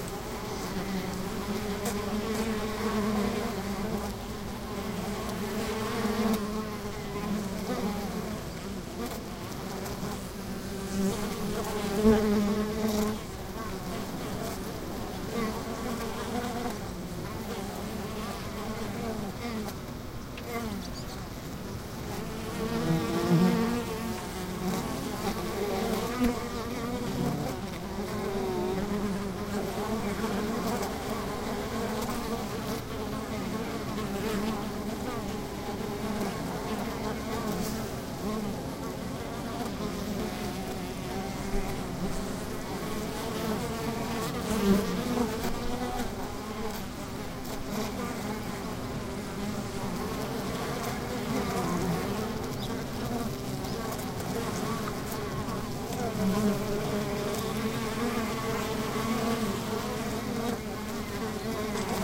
bees - a lot of them
The entrance hole of our bee hive. Quite busy bees, collecting pollen, at this special moment from the balsam near the fish ponds.
Set the Zoom H2 in front in 360° mode.
bee, beeflight, beehive, bees, buzz, buzzing, flight, fly, insect, naute